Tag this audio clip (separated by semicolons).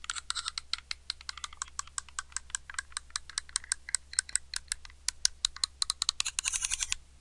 knife; manipulation; MTC500-M002-s14; pitch; utility